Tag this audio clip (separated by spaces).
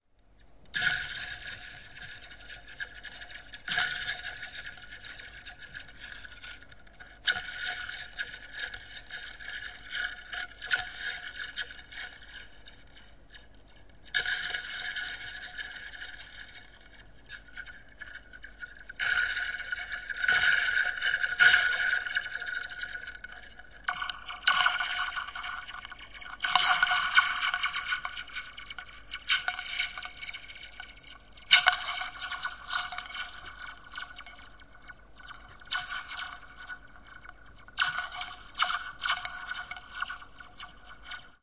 experiment experimental metallic toy